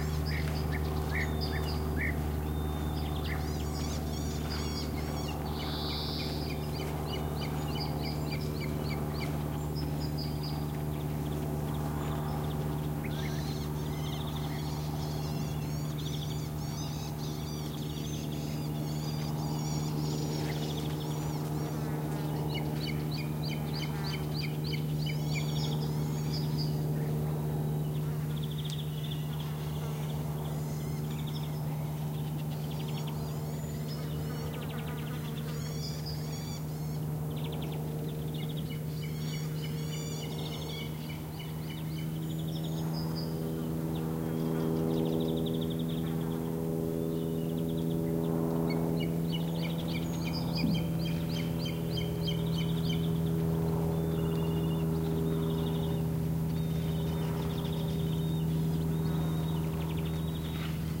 part of the '20070722.pine-woodland' pack that shows the changing nature of sound during a not-so-hot summer morning in Aznalcazar Nature Reserve, S Spain. Trailing numbers in the filename indicate the hour of recording. An airplane passing by, bird calls and insects... which is the background?